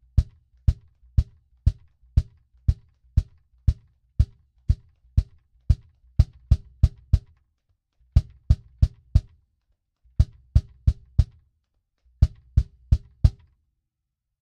Cajon Bass Drum Percussion
Just a selfmade cajon with 16 snare strings and a bass only recording with a foot kick.
120bpm, bass, beat, cajon, drum, loop, percussion, rhythm, sample, snare